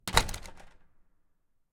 door slam
The sound of a slammed door. Recorded with the Sony PCM D100.